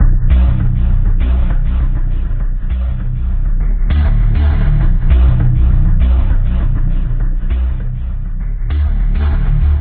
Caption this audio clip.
Bass line 1
Hello friends!
Electronic motives for your music, game or cinematic production ;)
Absolutely free, just download and use it!
Best wishes and good luck!
bass-line, drums, electronic, low-bass, rhytmic, sub-bass, techno, trance